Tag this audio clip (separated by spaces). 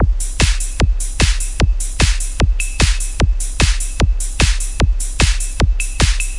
drum drums loop techno tekno